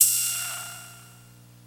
mm hat op
Electronic open hat. Sort of analog, inspired by the tr-606.
...pretty proud of how this turned out...
analog, drum, electronic, hat, hi-hat, lofi, percussion